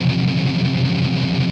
dis muted F guitar
Recording of muted strumming on power chord F. On a les paul set to bridge pickup in drop D tuneing. With intended distortion. Recorded with Edirol DA2496 with Hi-z input.